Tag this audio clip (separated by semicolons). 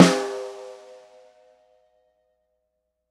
Shot; Sm-57; Snare